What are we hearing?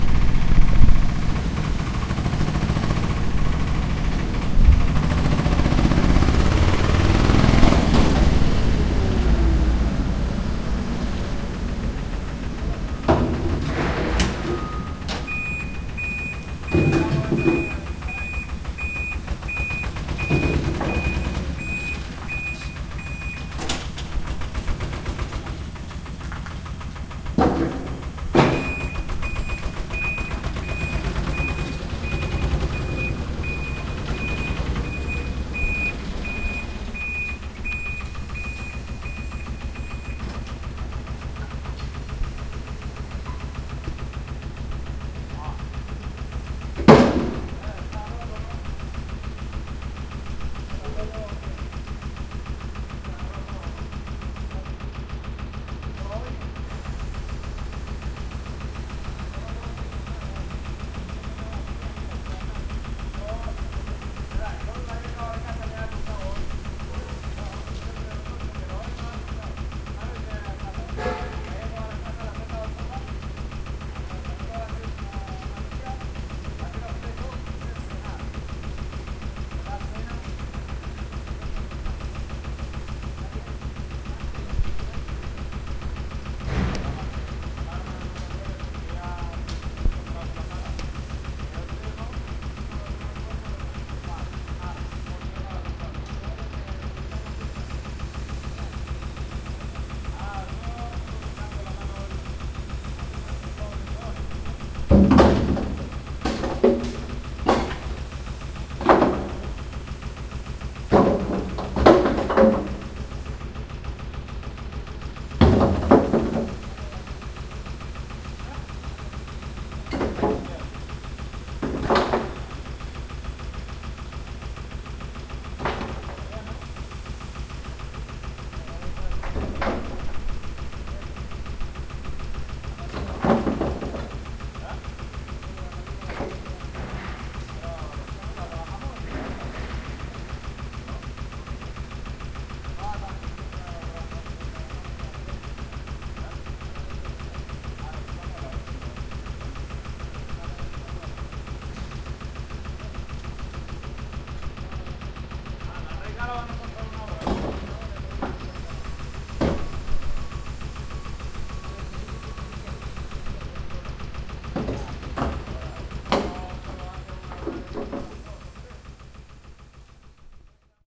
Construction workers outside the TBM [ Tunnel boring machine ] carring stones to a dumper truck.
No windshield used because I was protected by a ledge.